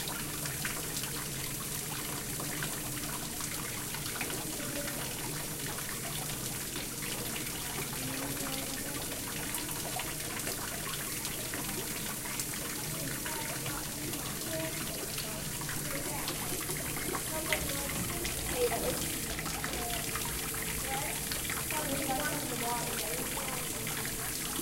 The sound of the Kingdoms of the Night exhibit at the Nenry-Doorly Zoo in Omaha, Nebraska, in the swamp near some bubbling water.